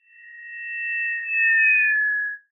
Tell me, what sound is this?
Manipulated C ton
experimenting with an Audition dolby effect on the stereo. I forget the rest of the detail .. will describe later, ok?